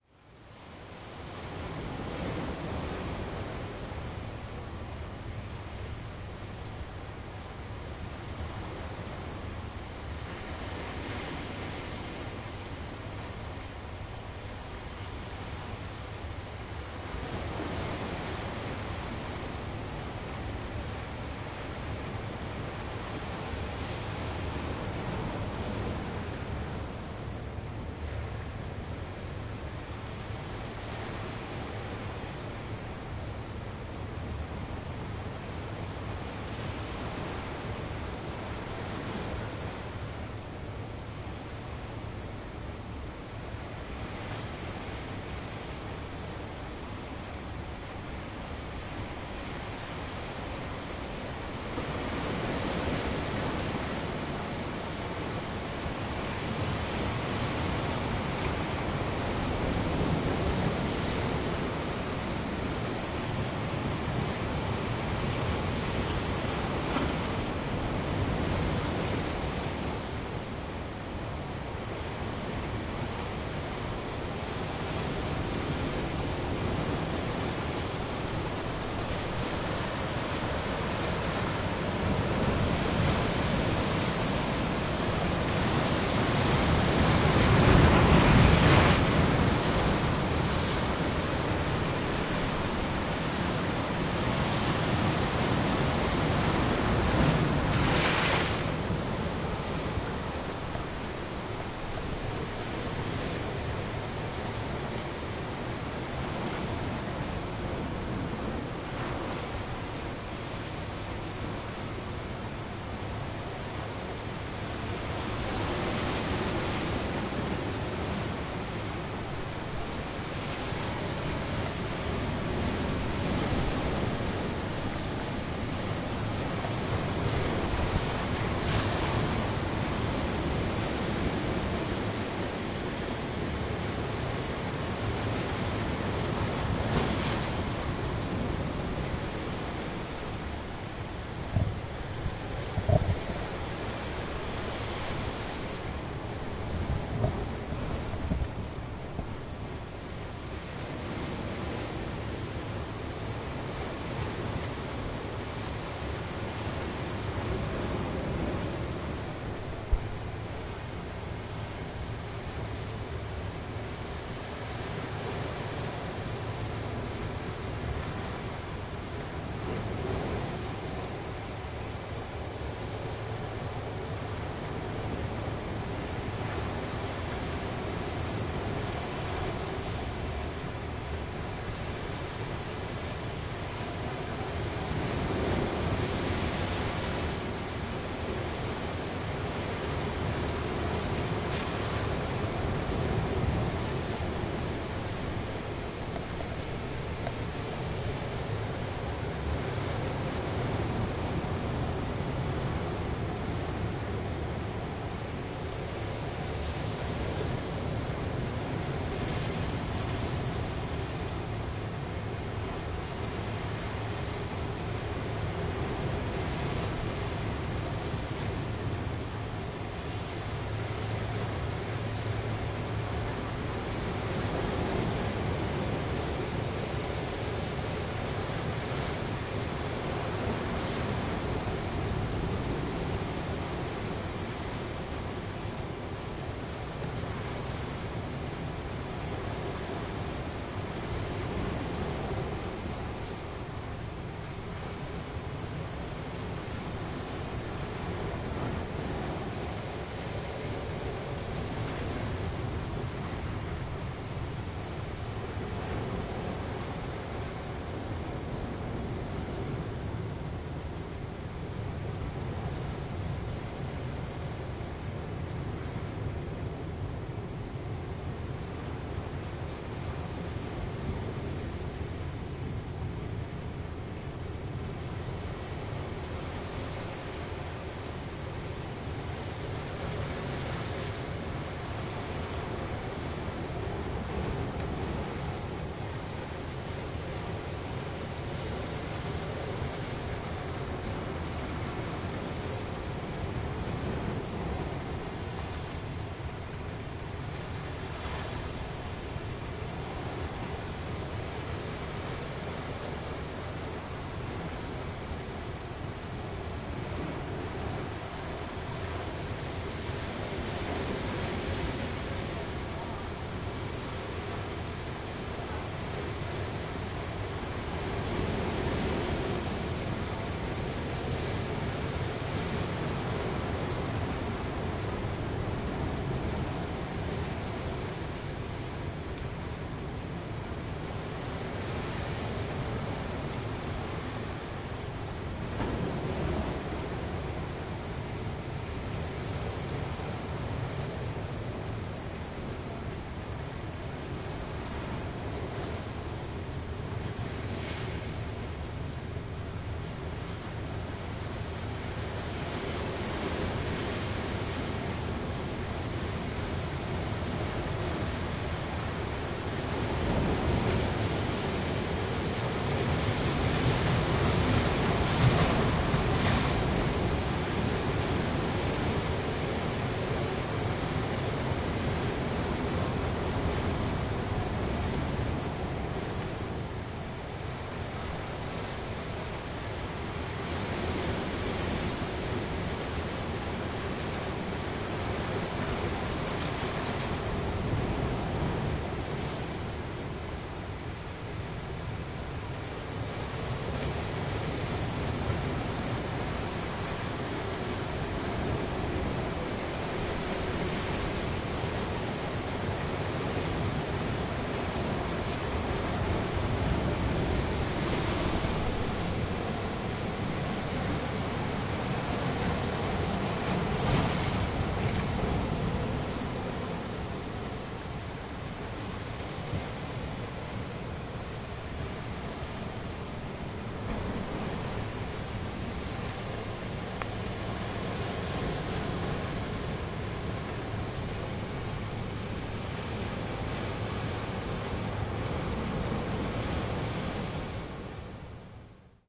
This is a seven minute recording of ocean waves breaking on a rocky ledge along the shore of Casco Bay in Maine. Recorded in the late afternoon on Bailey Island with a hand held Zoom H2, using the internal microphones and a windscreen.